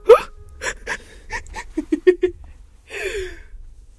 Eline giegel
Eline-Vera had to giggle during recording session, because the studio technician is a very funny guy.
(accidental-recording)